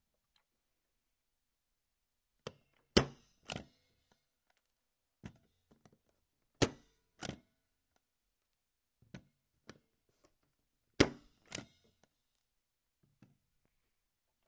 Briefcase Open & Close
Opening and closing a briefcase
close, open, briefcase